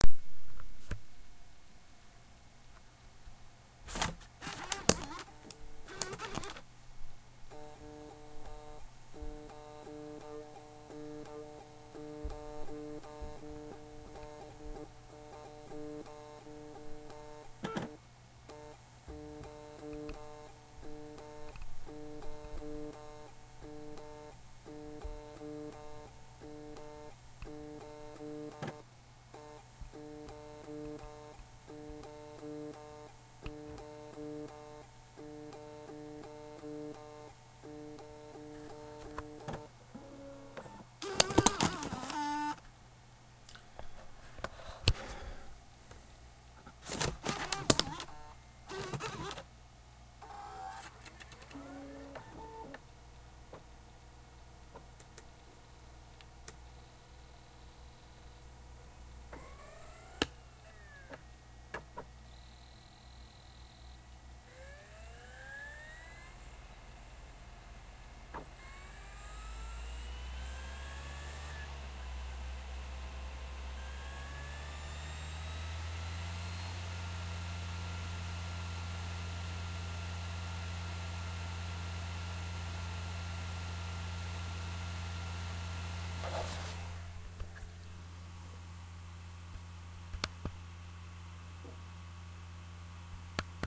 my DVD ROM misbehaving recorded with iphone
technical, malfunction, dvd-rom, electronics